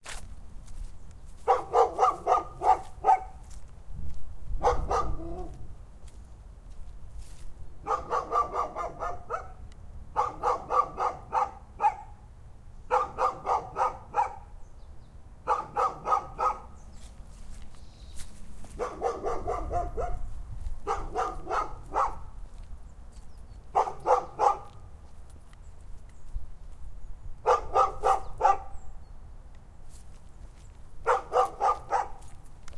An insisting and annoying dog recorded in a forrest in Aarhus. Recorded both close and from a distant.